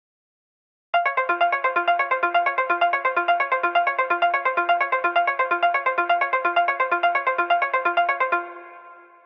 Simple arpeggiated pluck synth loop, from an old track of mine.